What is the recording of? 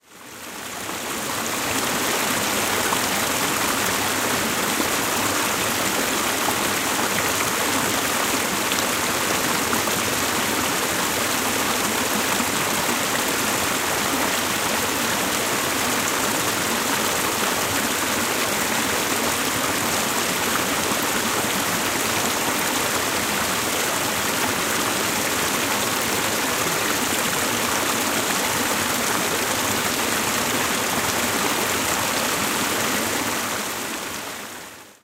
Water Flowing Through Very Close Rapids 1
Very close field recording of water flowing through some rapids in a creek.
Recorded at Springbrook National Park, Queensland with the Zoom H6 Mid-side module.